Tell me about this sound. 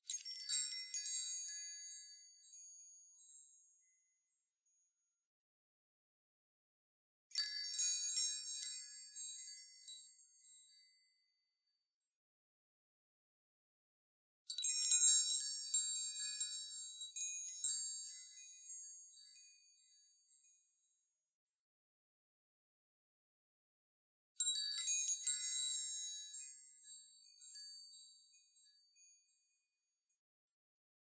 Door chimes
Recording of a set of door tubular chimes being moved.
FORMAT:
Zoom H4n
Sennheiser MKE600
Created by students of Animation and Video Games from the National School of arts of Uruguay.
bells, windchime, chimes, chime, door, windchimes